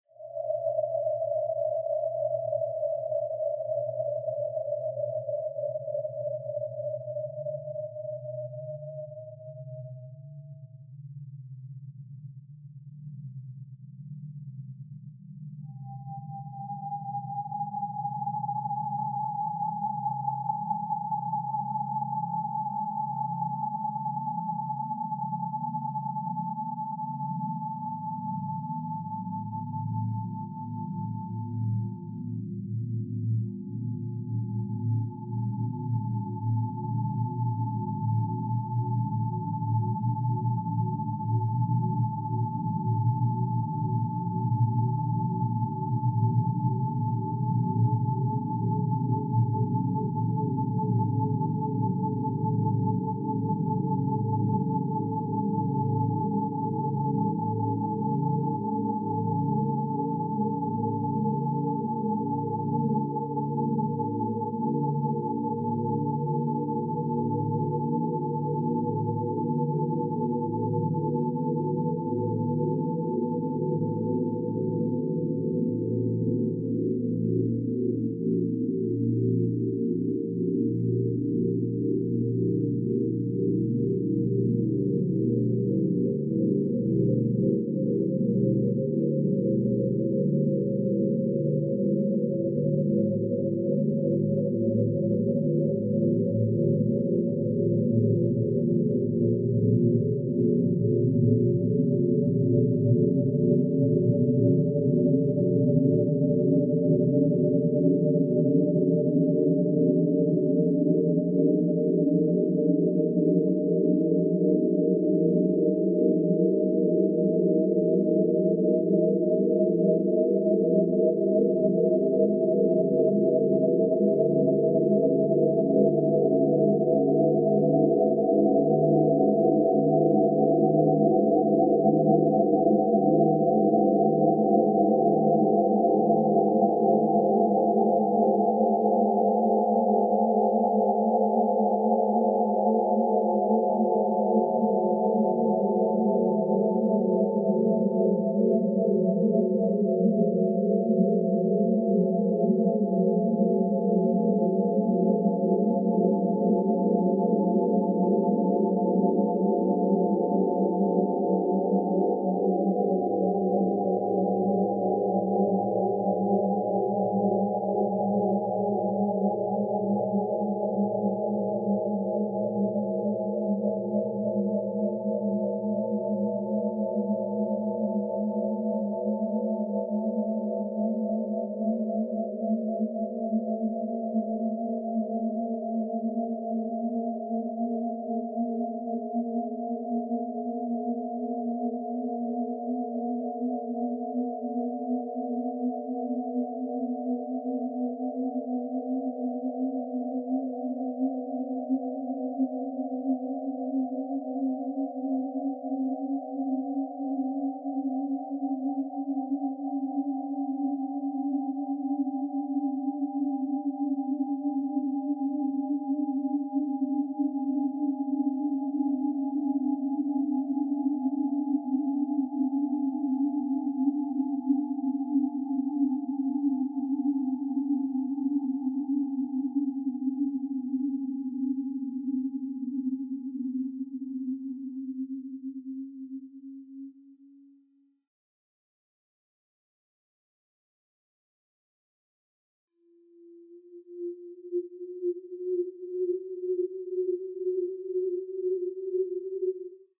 Long sequence of mangled other sequence depicting space.
fiction, long, fi, scifi, science, space, synth, soundscape, ambient, sci